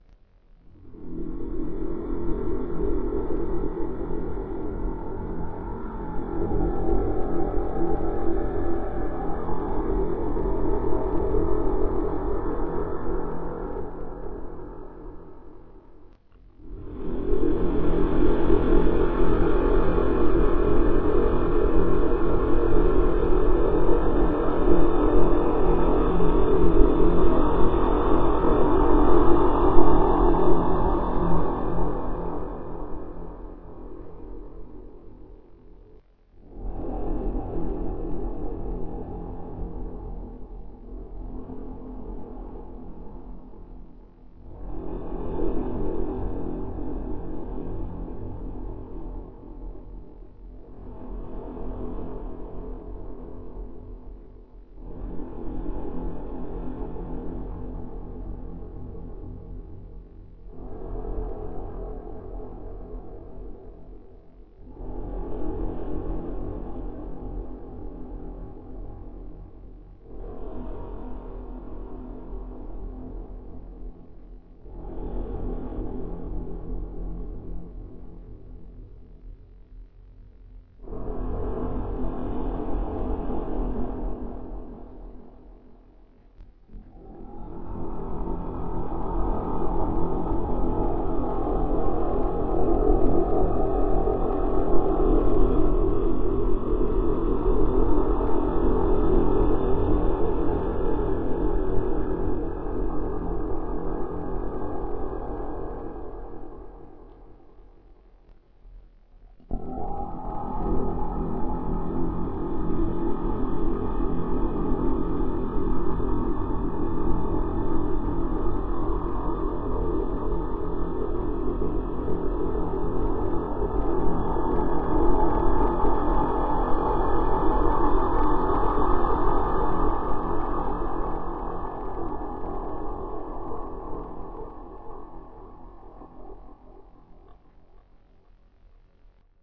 breathing thro didgerido
Breathing through a 1.5 metre didgeridoo to obtain a dramatic sound. NGWave PC software. Sennheiser shotgun microphone.I'm new to this and something happened with the upload.Track is running a fair bit slower than I thought it would but feel it's still unusual enough for an underscore.
dramatic-sound, breathing, didgeridoo-breathing, huffing